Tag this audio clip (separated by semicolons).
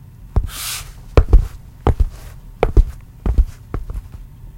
shoes; tile; floor; tennis; walking; male; footsteps